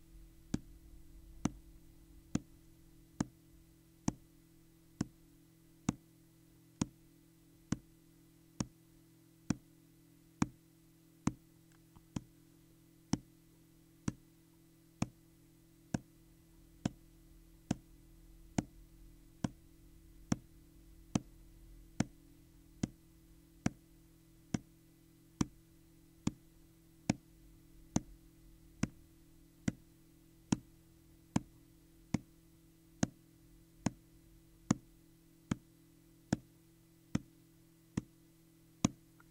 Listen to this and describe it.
Foley: an old dog wags its tail against the floor. Made by fingers on a book.